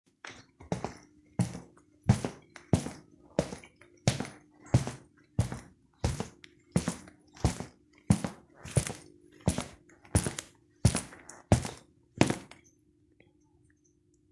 Kitchen floor - heavy footsteps